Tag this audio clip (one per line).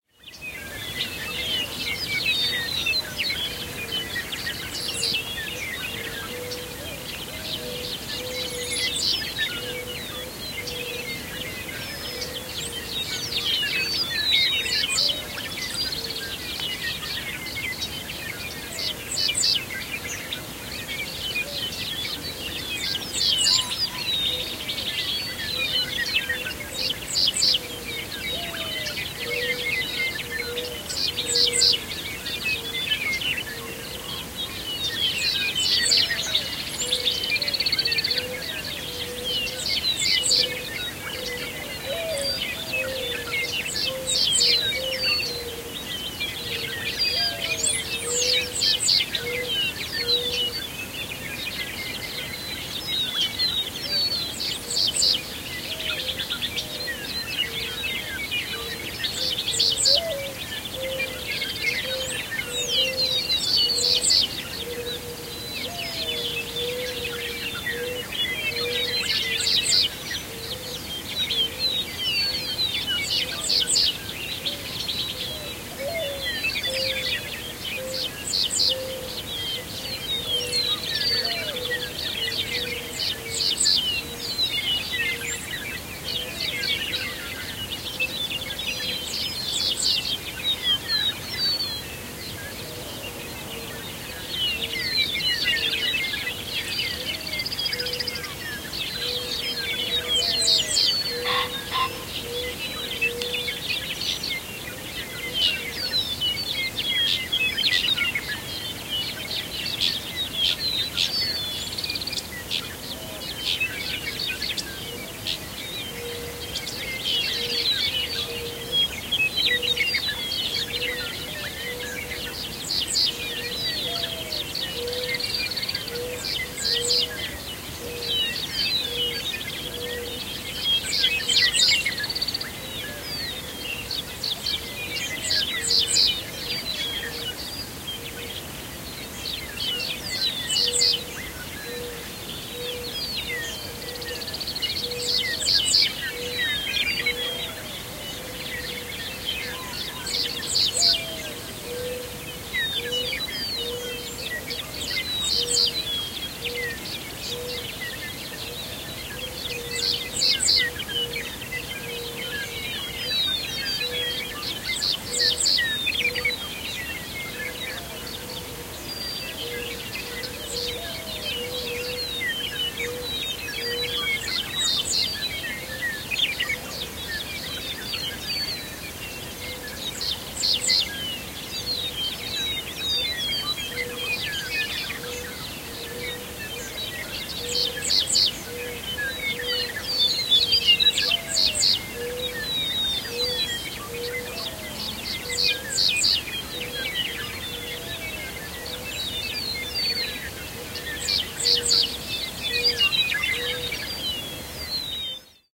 ambience
birds
country
doves
morning
peaceful